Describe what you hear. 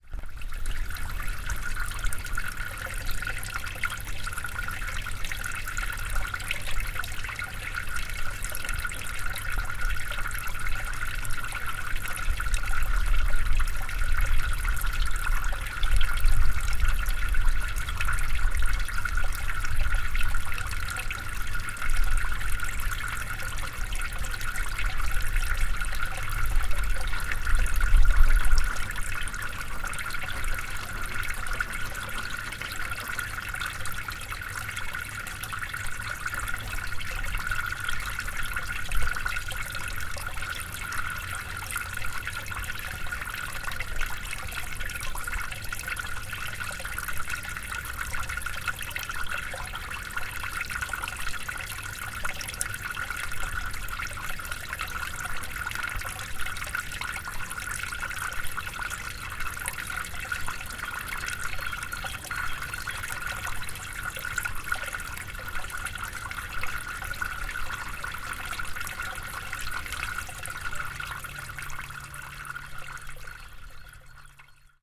Chiemsee Fraueninsel Frühling Regenrinne
Recorded on Chiemsee's Fraueninsel during a rainy day in spring.
Recording Device: Zoom H4 1st generation w/MD421 emulation.
Editing and normalizing to -3dB using audacity 2.1